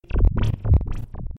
Original track has been recorded by Sony IC Recorder and it has been edited in Audacity by this effects: Wahwah.
alien, galaxy, game, machine, space, teleport, ufo